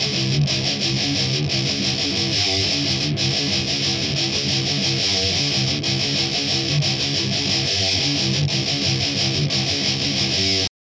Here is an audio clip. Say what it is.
THESE ARE STEREO LOOPS THEY COME IN TWO AND THREE PARTS A B C SO LISTEN TO THEM TOGETHER AND YOU MAKE THE CHOICE WEATHER YOU WANT TO USE THEM OR NOT PEACE OUT THE REV.
guitar, thrash, groove, rythum